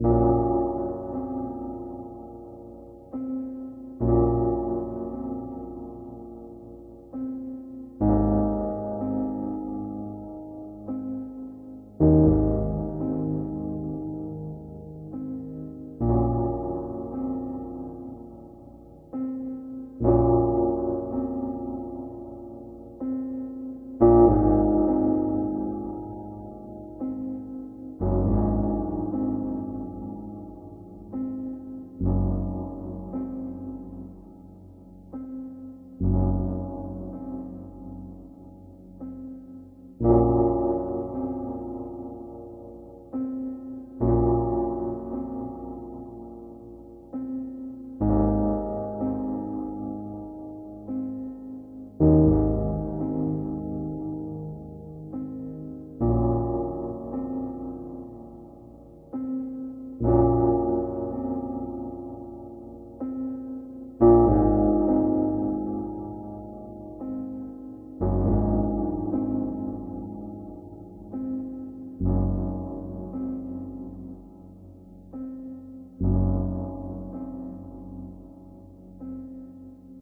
sad piano (100bpm)
This is a melancholic Piano sound i made recently
It was created with FL Studio, with FL Keys and some mixing
100bpm, dark, keys, mad, melancholic, minor, piano, relax, reverb, sad, slow